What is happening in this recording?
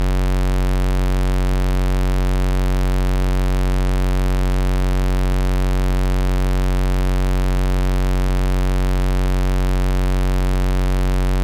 Doepfer A-110-1 VCO Rectangle - F#1
Sample of the Doepfer A-110-1 rectangle output.
Pulse width is set to around 50%, so it should roughly be a square wave.
Captured using a RME Babyface and Cubase.
A-100, A-110-1, analog, analogue, electronic, Eurorack, modular, multi-sample, oscillator, raw, rectangle, sample, square, square-wave, synthesizer, VCO, wave, waveform